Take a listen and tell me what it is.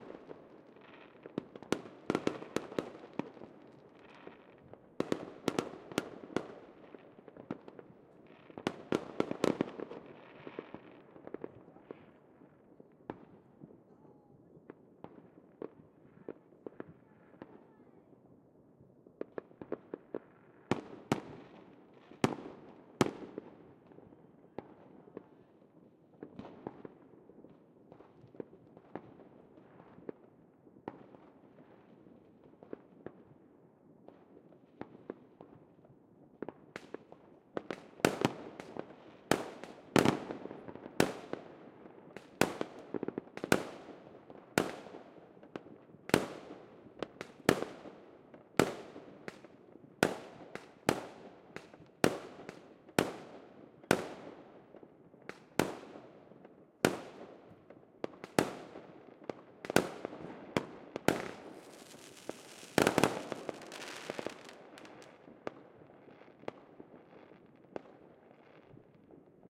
Fireworks Crackle
Audio was recorded on ZOOM H5 with X-Y stereo microphone. The sound contains a lot of explosions of fireworks for the New Year
bang, CZ, Czech, New